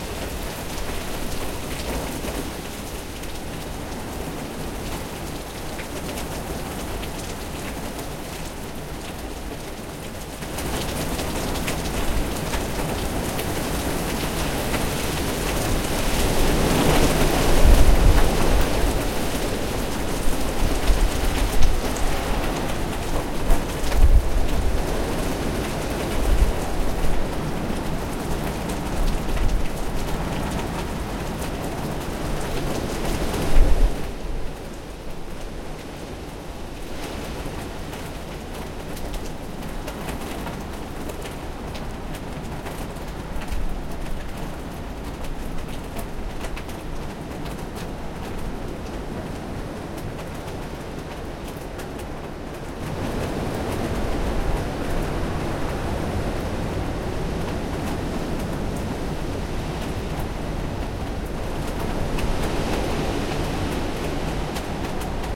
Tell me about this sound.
Heavy Rain and Wind
Heavy rain storm.
dripping, heavy, wind